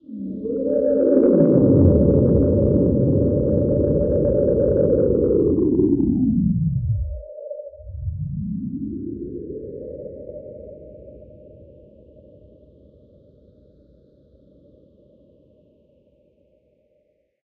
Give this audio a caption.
remix of "Eastern Whipbird 4X Slower" added by digifishmusic.
slow down, stereo fx, edit, delay, filter, reverb